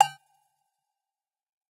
Tweaked percussion and cymbal sounds combined with synths and effects.
Abstract, Agogo, Oneshot, Percussion